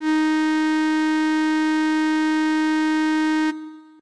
FM Strings Ds4
An analog-esque strings ensemble sound. This is the note D sharp of octave 4. (Created with AudioSauna, as always.)
strings, synth